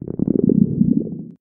An organic clic